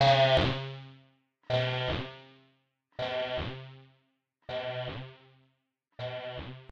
Good day. This atmosphere, texture sound make by Synth1. Hope - you enjoy/helpful